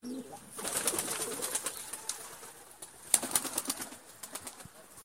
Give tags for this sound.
doves wings birds fling dove taking-off fly Bird

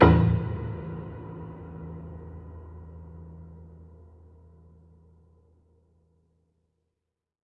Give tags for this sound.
bass instrumental percussive piano

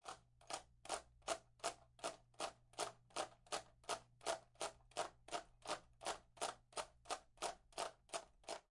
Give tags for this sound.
car hard drive

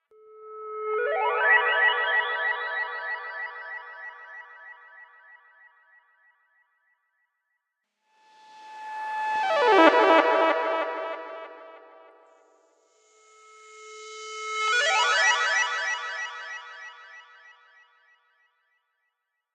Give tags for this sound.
analog analog-synth analouge delay echo electronic flourish fx hardware korg monophonic oscillator pentatonic pentatonic-scale sci-fi shimmer sparkle synth synthesizer wave

